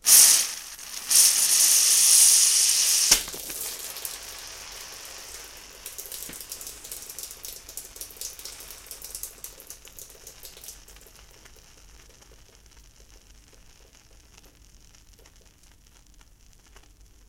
Soda Pressure Spill 01
A bottle of soda is opened, then spilled on the floor
Drink, Pressure, Soda, Spill